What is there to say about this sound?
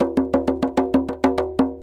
tambour djembe in french, recording for training rhythmic sample base music.
loop, djembe, drum